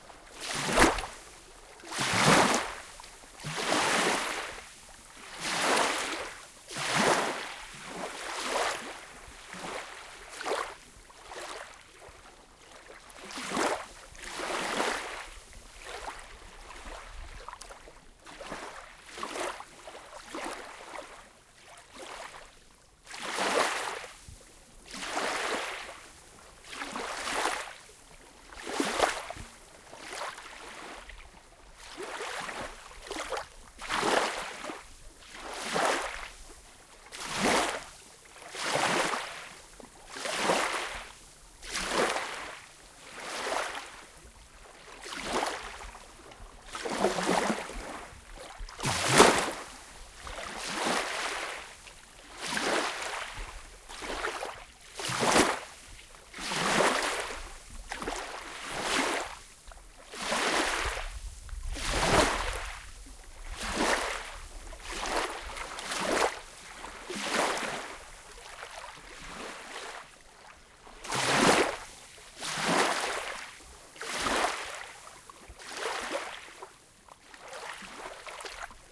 Water waves
ambient, background, beach, bubble, coast, gurgle, ocean, scrushing, sea, shore, splash, water, wave, waves, waving, whoosh